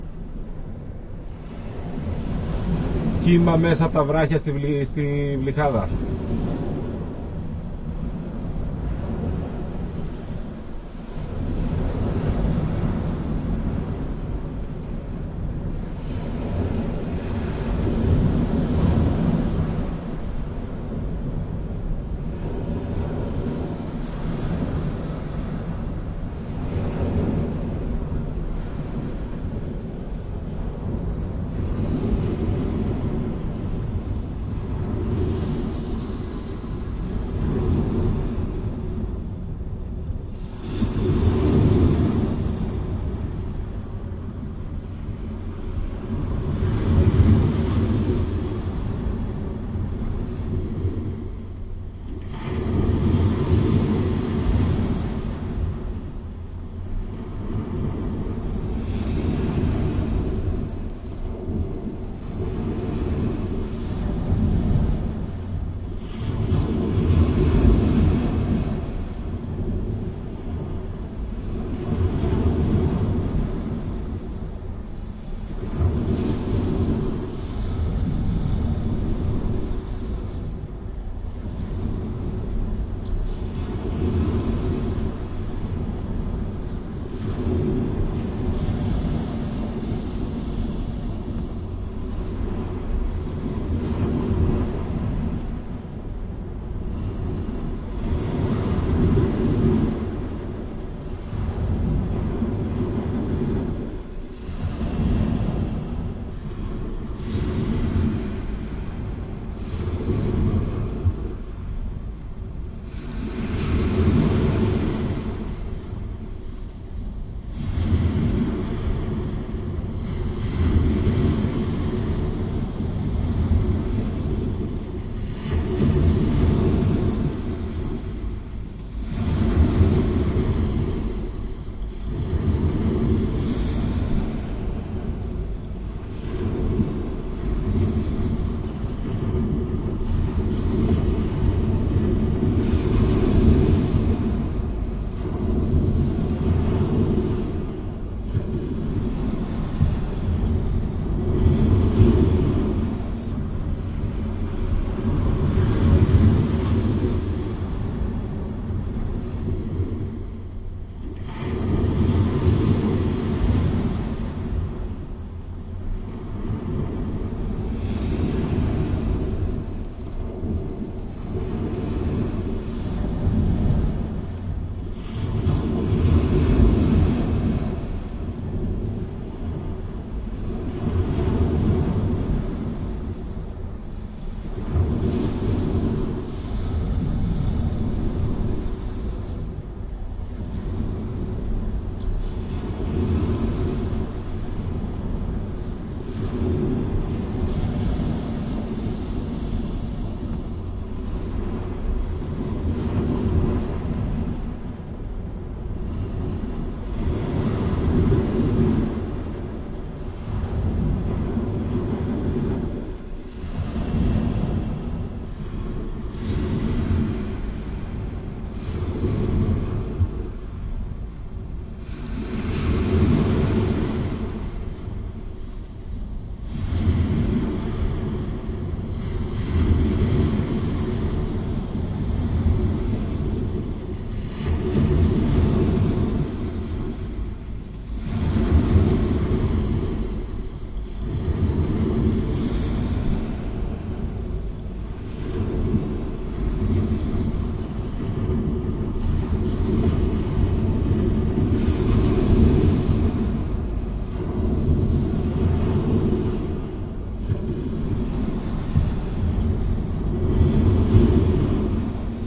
sea wave sounds like breathing
sea wave recorded from the inside of a rock.i use a ten track deva fusion and two shoeps mics in xy set up